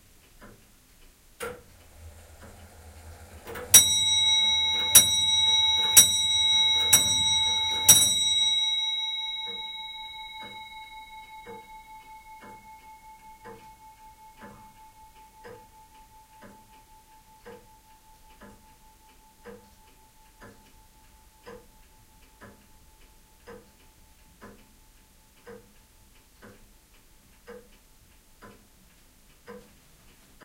my friend Peter's Grand-father clock striking five. Soundman OKM > Sony MD > iRiver H120
clock, machine, strike